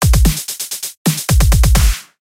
FL Loop 6
Another Cool Funky groove I created in FL Studio 12. the 6th in my series.